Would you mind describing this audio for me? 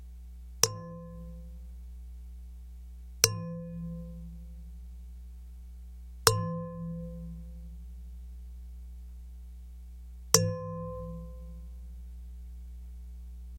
A large metal pot lid hit with car keys. Recorded with a contact mic into a Zoom H4N.
bang, bing, bong, contact-mic, gong